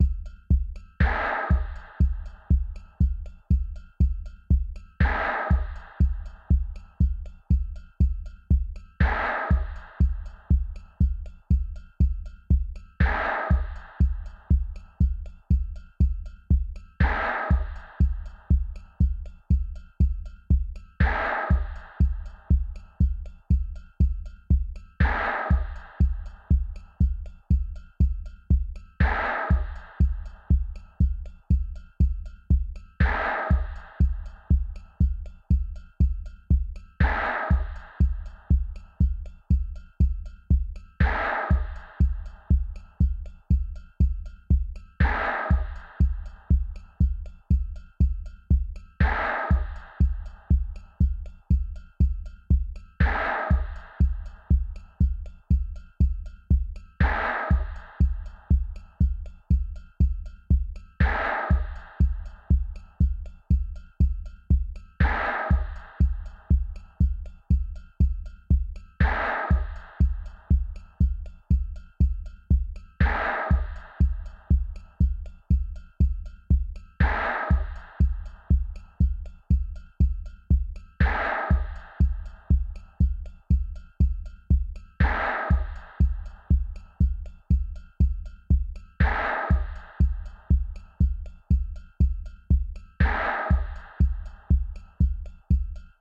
Game loops 001 only drums loop 120 bpm
120, bpm, drums, electronic, game, loop, loops, music, synth